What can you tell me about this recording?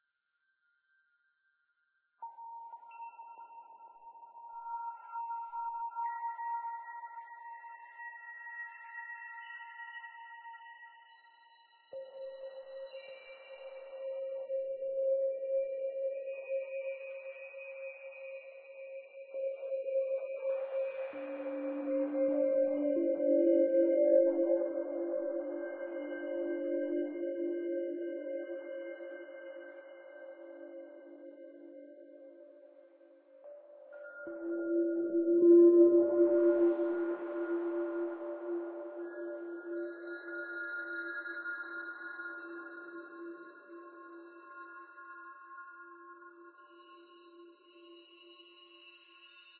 Space drops
Spacey melodic drops created in Reaktor, using space drone and numerous effects.
Drone, Synth, Ambient